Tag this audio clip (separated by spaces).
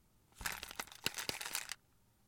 jingle searching